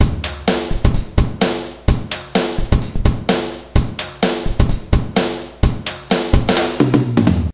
Funky rock/hip hop beat with rim clicks.